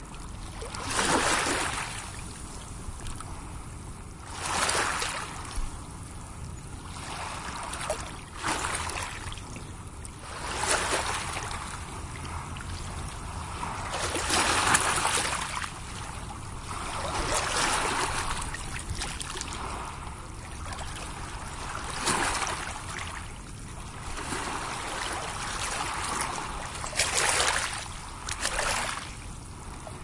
Recorded with a pair of Crown PZM 185's taped to the sides of a cardboard box about the size of a human head for nice stereo imaging. Seamlesly loopable.
beach, waves, lapping, ambience, marine, shore, water, loop